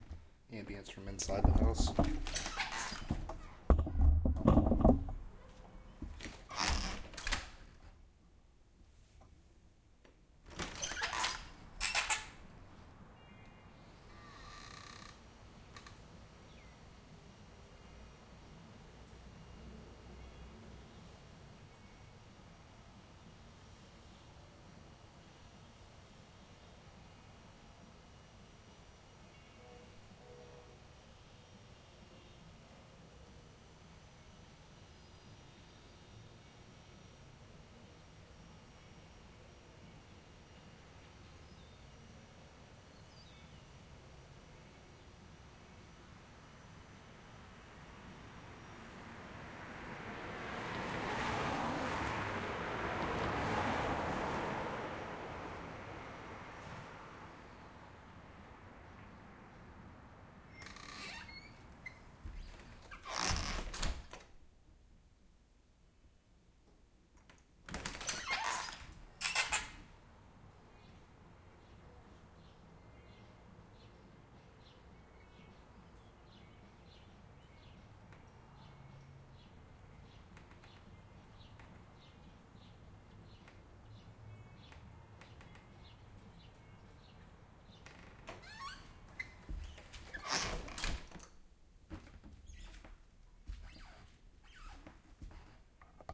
AAD Front Door Open Interior 1
This is one of our raw recordings no treatment. This is an M-S Stereo recording and can be decoded with a M-S Stereo Decoder.
House, Open, Creepy, Old, Close, Wood, Creak, Door, Squeak